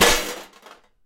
aluminum cans placed in a metal pot and punched with a fist